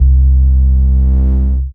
home made 808 bass drum , made using FL Studio and the plugin 3osc and camel crusher distortion ( Fl studio is fun )
808, bassdrum, sinexwave